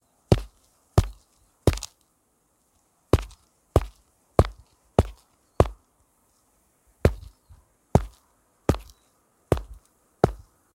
Stone Strike/Hit
hit, impact, strike, stone